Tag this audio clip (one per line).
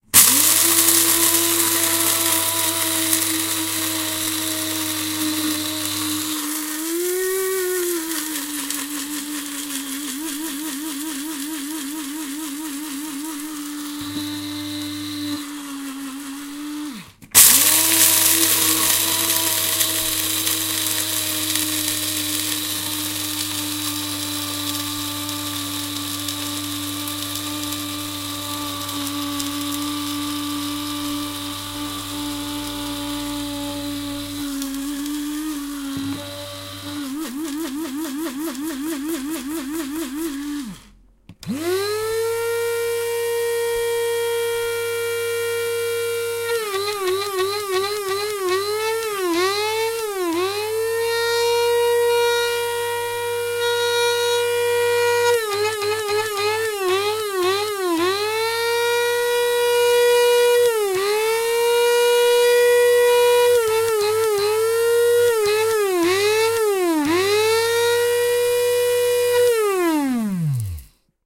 alone
coffee
empty
engine
grinder
grinding